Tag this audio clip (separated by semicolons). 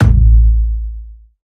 effected; kickdrum; oneshot; kick; kick-drum; designed; one-shot; processed